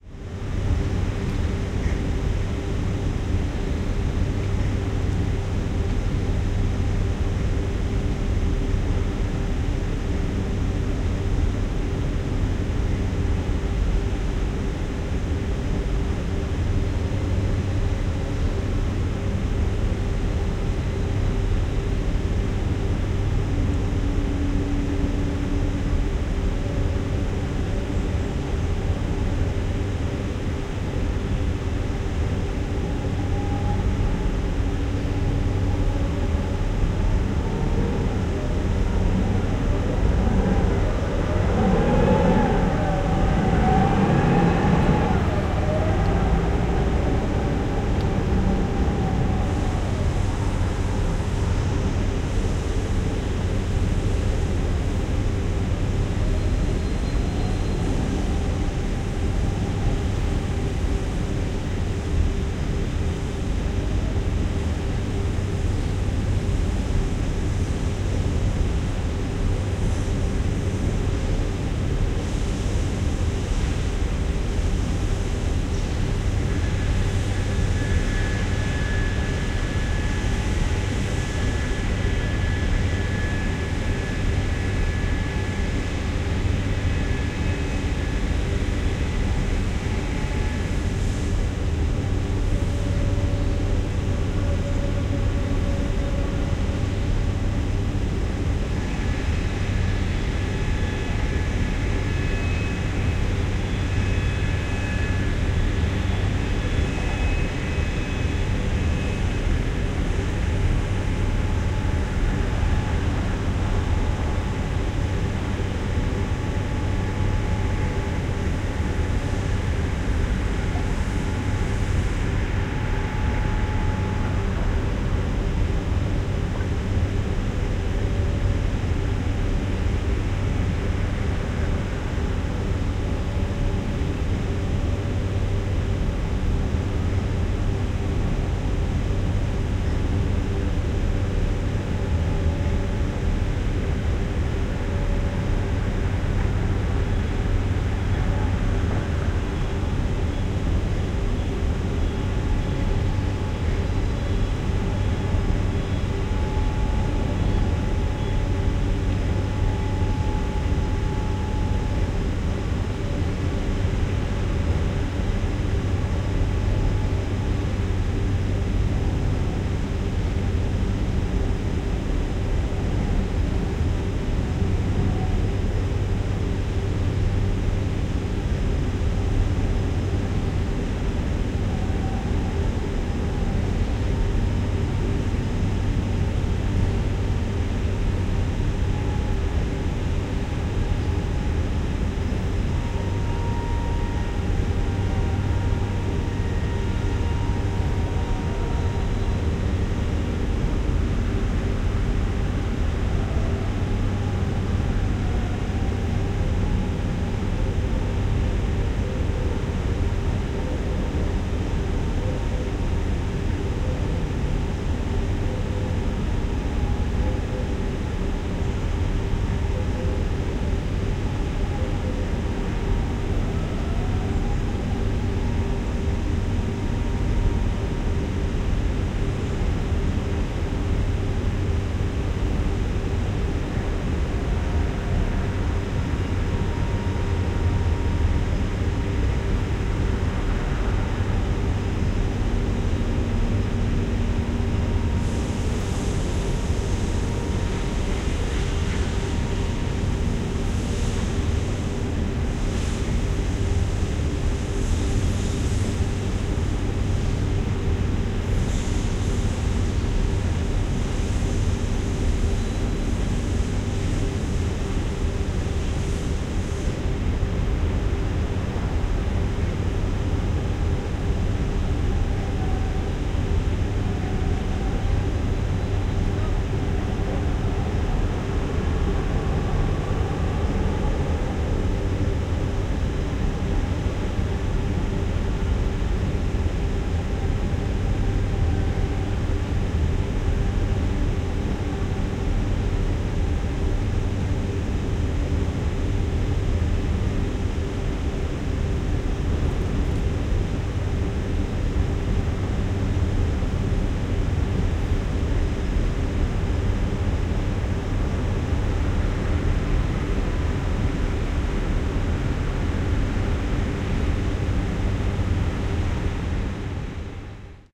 Fortunately I don't live nearby, but not far from home there is a factory that manufactures motorhomes, that's the atmosphere, made of machines, hammer noise blowers and all kinds of activity.